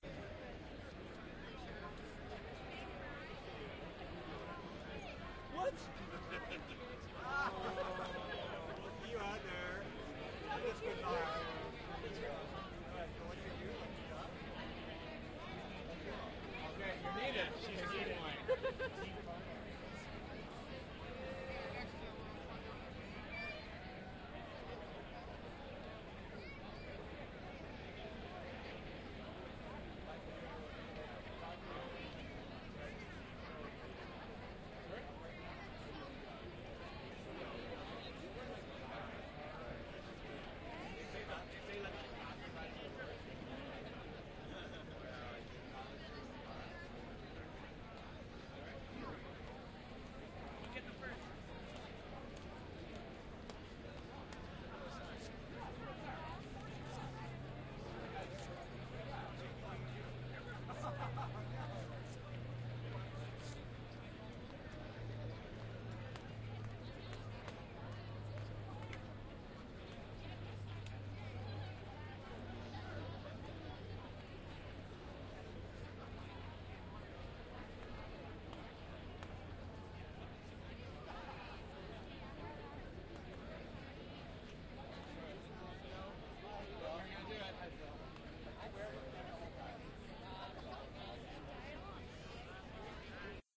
Stereo binaural field recording of a large crowd talking amongst themselves.
talking, walla, crowd, binaural, group, stereo, field, recording